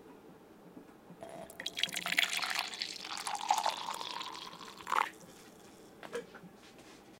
One of the most beautiful man-made sounds is coffee pouring into a mug. Made on June 23rd, 2016 using a Sennheiser ME66 Microphone going into a Marantz PMD661.
coffee, morning-routine, pouring